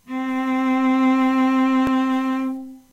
A real cello playing the note, C4 (4th octave on a keyboard). First note in a C chromatic scale. All notes in the scale are available in this pack. Notes played by a real cello can be used in editing software to make your own music.
There are some rattles and background noise. I'm still trying to work out how to get the best recording sound quality.